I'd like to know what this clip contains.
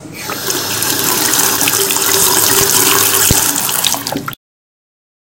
agua llave
water cold fresh
bedroom, water, fresh